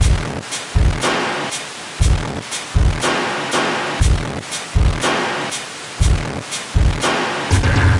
Just Mixed 1
sometimes the trick is moderation.
I created this
a processed version of this sound:
by loading it in Paitshop pro and applying image processing (see original file for details).
Despite the fact my file was popular, I myself found the sound too abrasive and harsh to be musically useful.
Here I apply a classic trick when using extreme distortion techniques and just blended the processed sound with the original dry one.
The result is a loop that I feel sounds musical enough but also sounds very different from the original sound.
120BPM, image-to-sound, loop, noisy, paintshop-pro, processing, rhythm, sound-to-image